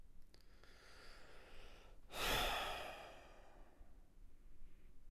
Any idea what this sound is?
Sigh, male
A recording of a despondent male sigh.